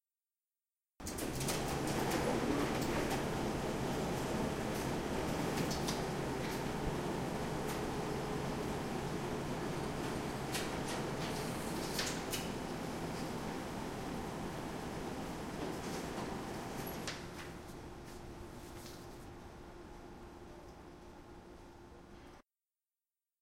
This sound shows the noise that a photocopier does when it is on. Furthermore, some background noise can be listened.
campus-upf, Copy, Noise, Paper, Photocopy, Print, Tallers, UPF-CS14